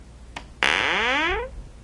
door open quick
open, door, foley